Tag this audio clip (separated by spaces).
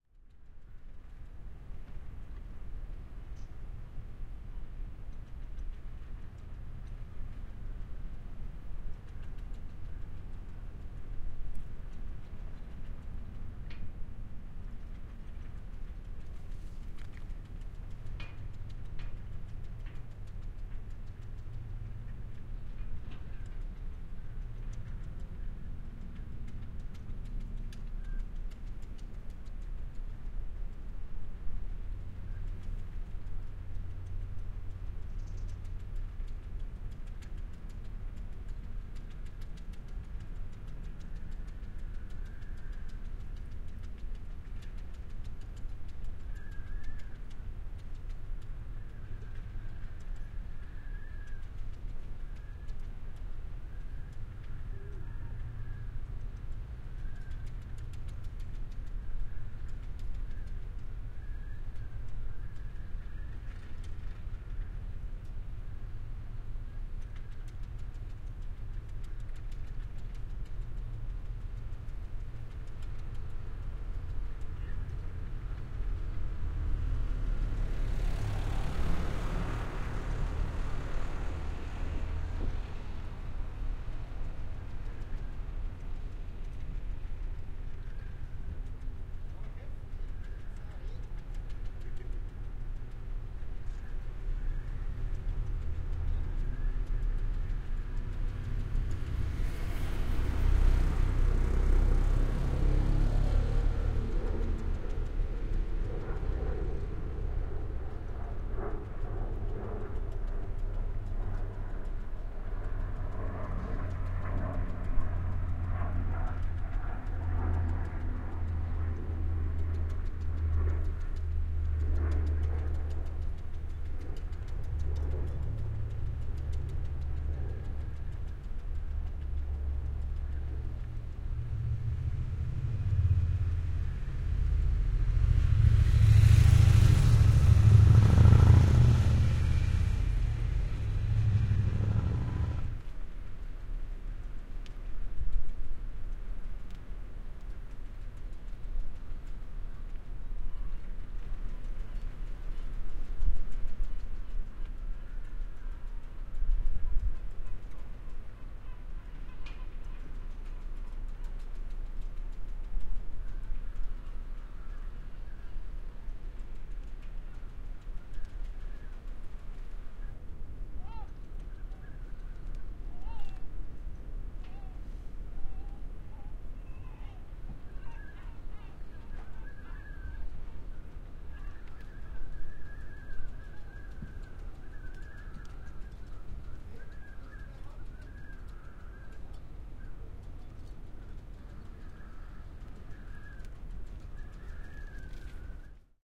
Field-Recording Wind Nature